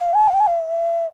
Few notes of a small ceramic ocarina, made by myself.